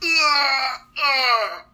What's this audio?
The sound of someone dying
Death, Dying, groan, grunt, moan, moaning